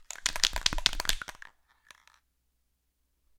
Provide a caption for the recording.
Spray Paint Shake Slow Five
Shaking a spray paint can five times, slowly.